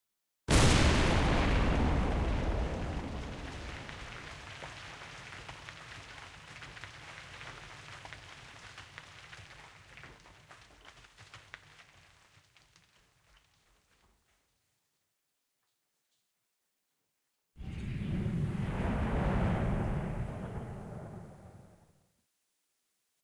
Processed recording of blast mining.
rock, rumble